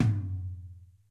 Rick DRUM TOM HI soft
Tom hi soft